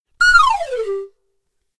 Pan pipe Down
very quick scale down on pan pipe
down, pipe, quick